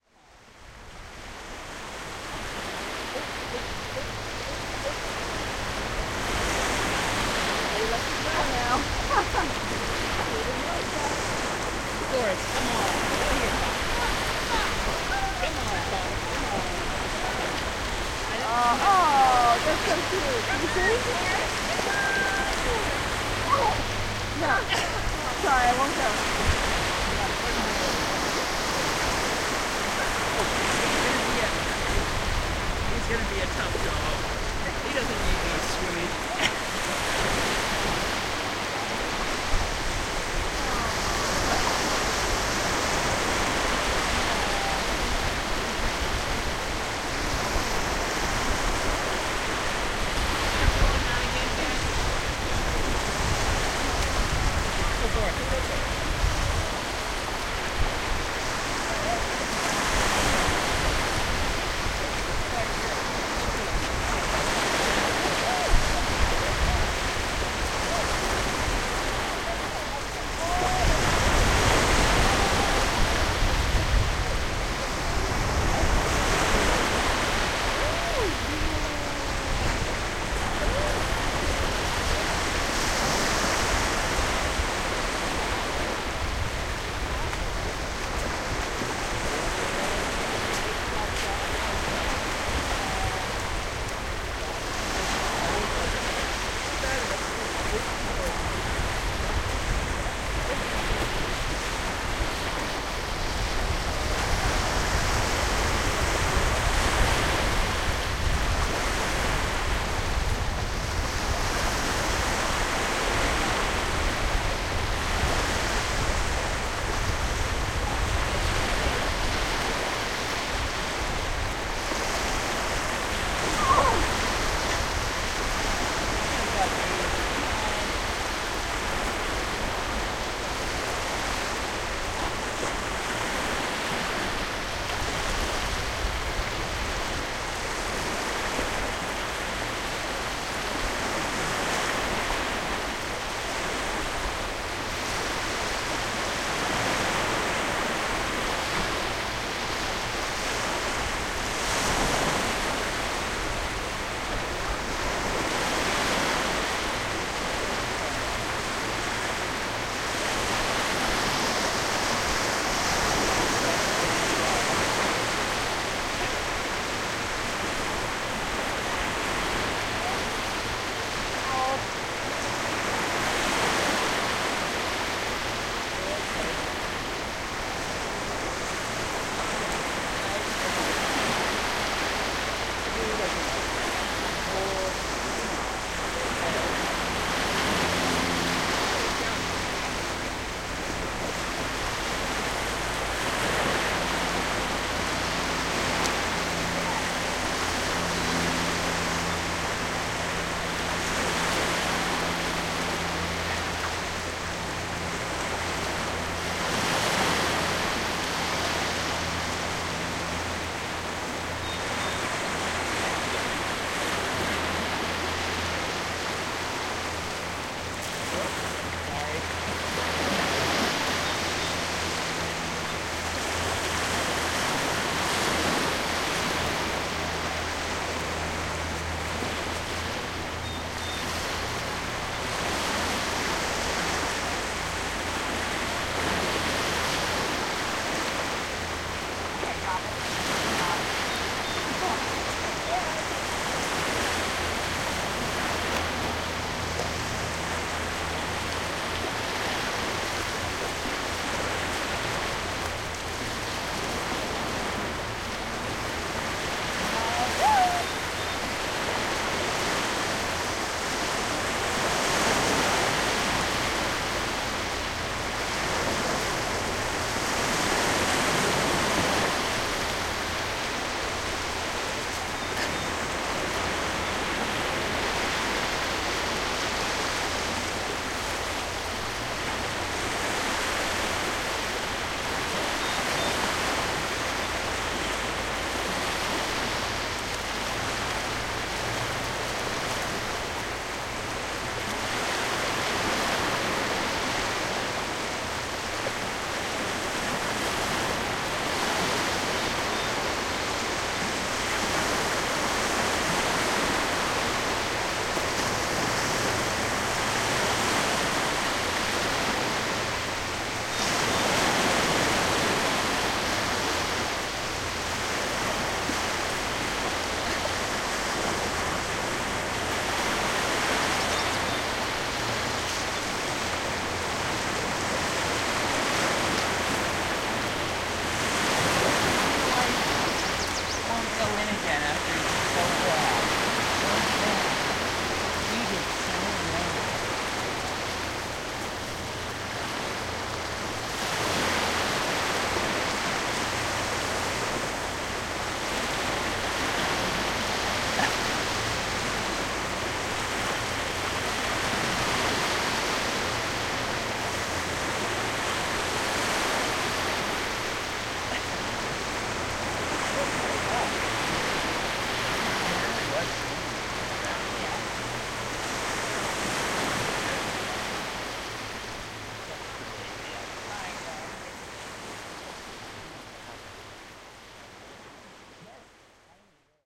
Seaside Afternoon
Recorded on a late summer afternoon at a beach on the Vineyard Sound with a Zoom H2, using the internal mics. Intermittent voices and a dog can be heard in the background.
seaside, beach